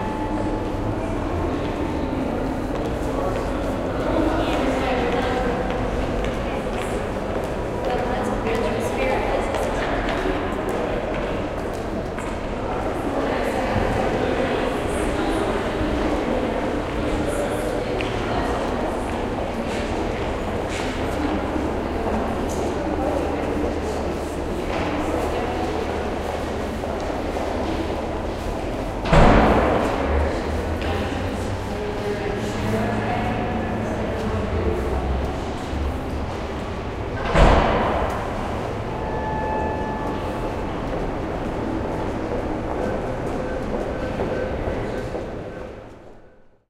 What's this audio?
Ambience captured in a large reverberant office tower lobby. Recorded with a Rode NT4 Mic into a Sound Devices Mixpre preamp into a Sony Hi-Md recorder. Transferred Digitally to Cubase For Editing. Recorded at Frost
Bank Tower at 401 Congress in Austin Texas.